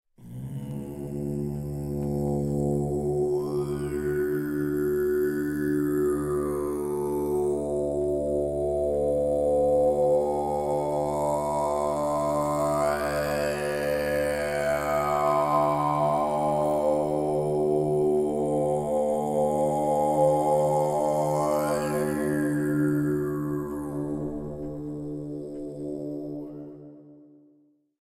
longer version as suggested by colargol..enjoy.
se electronics mic, presonus firebox audio interface, logic 6, psp vintage warmer, silver reverb, stereo delay. bounced down, fade in and out re bounced.